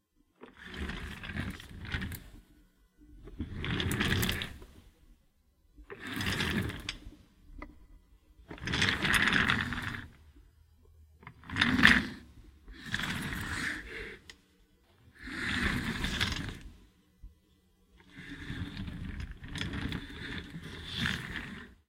Low rumble of an office chair rolling and dragging against the floor. Multiple takes, slow/long and fast/short ones.
Recorded with a RØDE Videomic from close range.
Processed slightly, a little hiss remains.